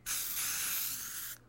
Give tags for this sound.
aerosol
can
graffiti
paint
spray

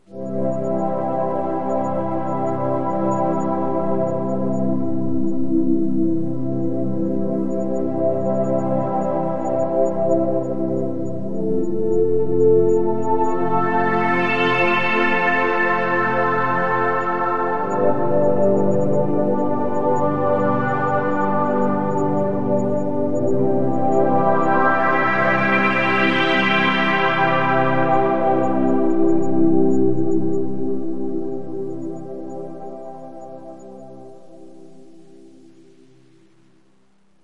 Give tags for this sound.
Pad
Tal
Analog
Reverb
dream
Rez
Synth
Space
Ambient
Synth1
Chord

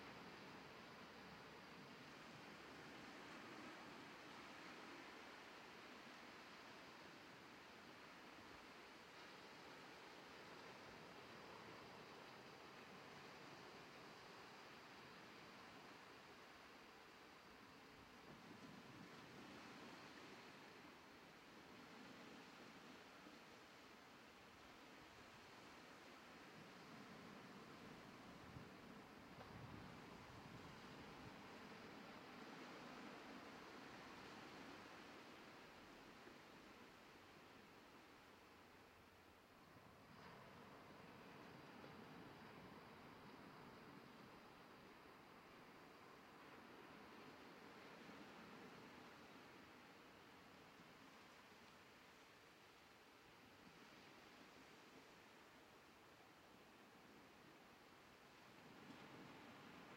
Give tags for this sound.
beach
coast
sea

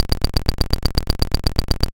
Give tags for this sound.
Tape
Click
Cracks
Percussion
Loop
FX
Clicky
Machine

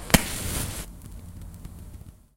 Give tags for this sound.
burn
burning
fire
flame
match
matches

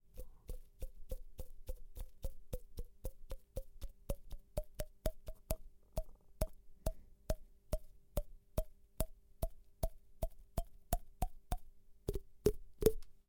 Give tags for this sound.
cheaks
fingers
human
mouth
open
Tapping

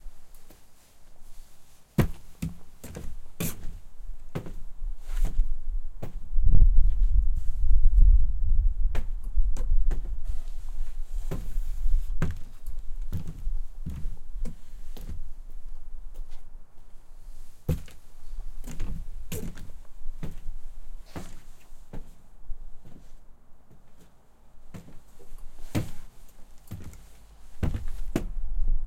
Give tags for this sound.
floor
footsteps
walking

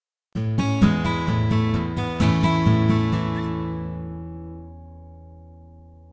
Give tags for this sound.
acoustic
background
broadcast
chord
clean
guitar
instrument
instrumental
interlude
jingle
loop
mix
music
nylon-guitar
radio
radioplay
send
sound
stereo

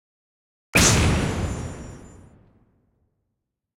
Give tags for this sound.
boo
tnt
xplode
pop
gun
bang
bam
explosion
explode
glitter
pow
explosive
july
shot
cracker
boom
kaboom

